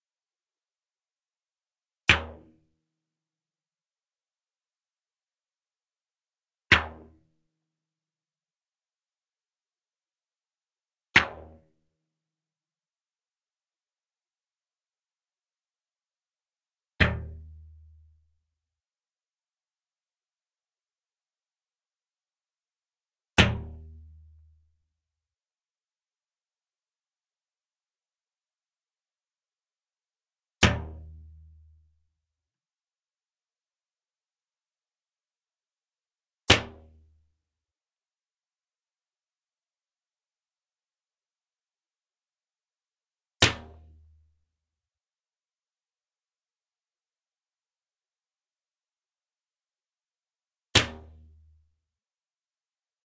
A mono recording of a glass fibre longbow being drawn and released a few times (without an arrow, dry firing).